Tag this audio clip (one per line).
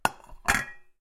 kitchen
dishes
clink
plates
stack
washing-up